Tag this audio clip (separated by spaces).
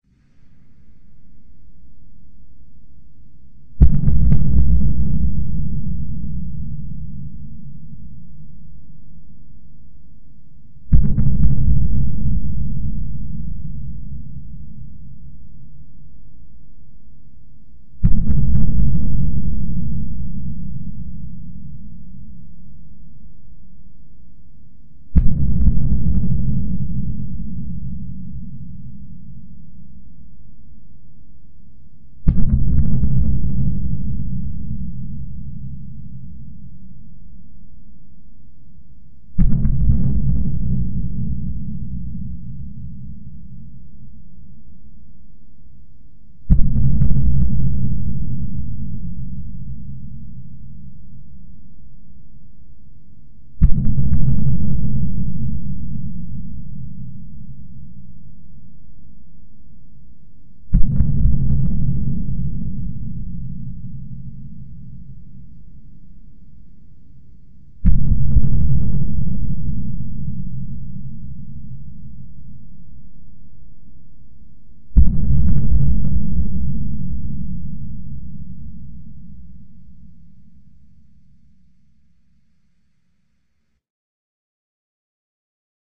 sound-effect loud Percussion horror Loop fx